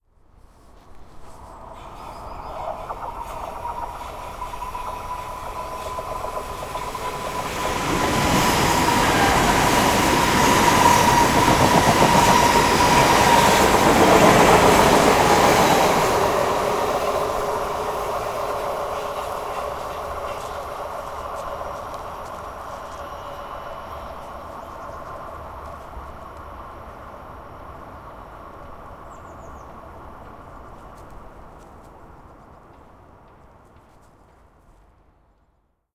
Metro passes 090407T2145
The Metro passes going from Gubbängen to Tallkrogen. The recording was made 7 April 2009 at 21:45 with a Zoom H2.
metro, stockholm, train